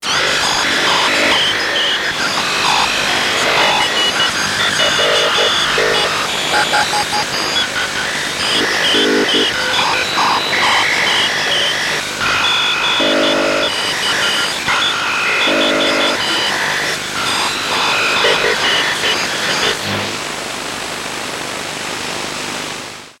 Slow several Morse stations in the short-wave.
electronic, morse, noise, radio, shortwave, static